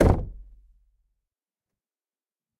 Door Knock - 32
Knocking, tapping, and hitting closed wooden door. Recorded on Zoom ZH1, denoised with iZotope RX.